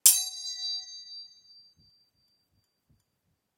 A metal Ping